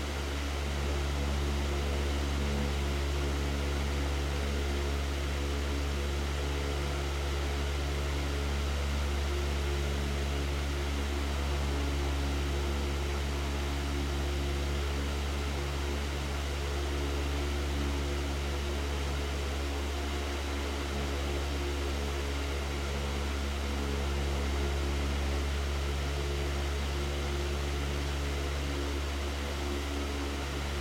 Fan 1 noisy
air fan, ventilador, abanico noise, ruido